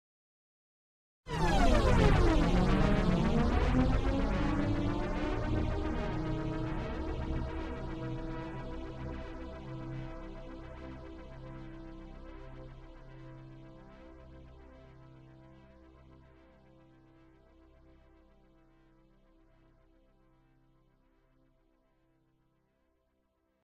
Sci-fi Retro
An old school retro sci-fi sound.
up, atari, futuristic, science, space, sound, travel, start, old, laser, school, scifi, sci-fi, fiction, retro, weird